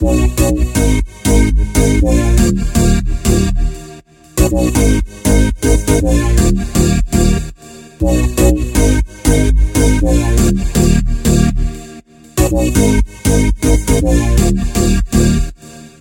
Future House Bass Loop
Bass, EDM, Electric-Dance-Music, Electronic-Dance-Music, future, house